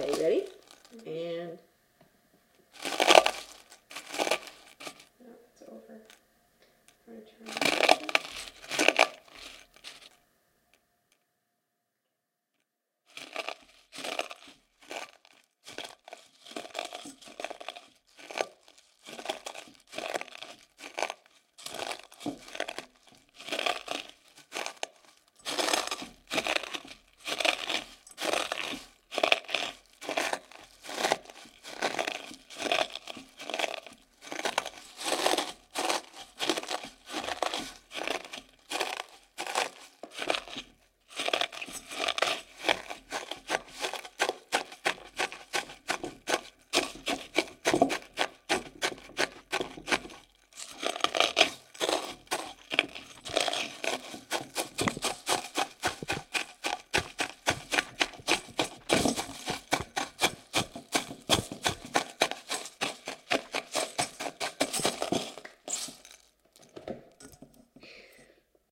Crunching noises
The noise of someone stepping on something crunchy
crunching, crushing, smushing, squashing, squishing, stepping, stomping